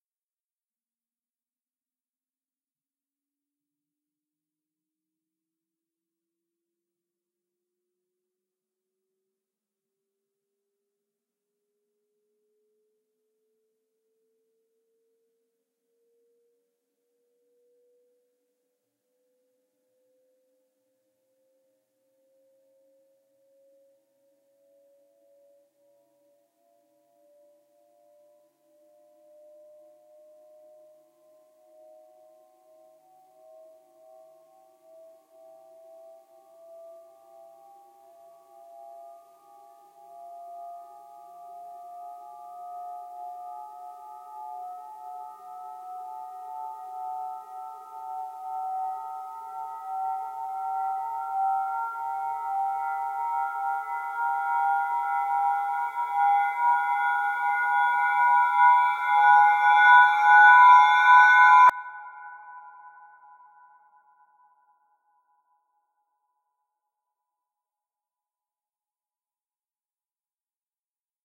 Glass Rising Build Up With Reverb
Glass Rising Build Up, now with reverb.